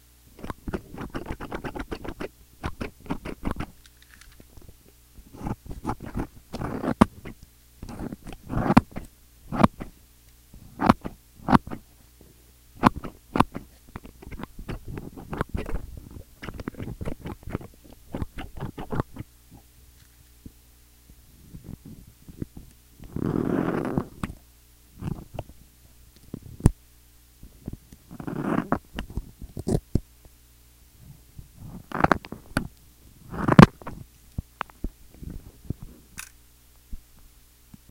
touching a scissors
perception, contact-mic, ambient